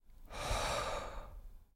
Male exhale. medium length.
exhale, medium-length